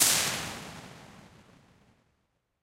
Impulse response recorded in the Mediterranean Conference Centre. Valletta, Malta. In The Knights Hall. A medieval hospital used by the crusaders. Main concert venue was too impractical to record an IR but the long cavernous Knights Hall was empty so hey...let's sweep.
The knights hall